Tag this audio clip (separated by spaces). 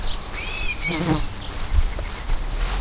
binaural country countryside fly insect nature summer walk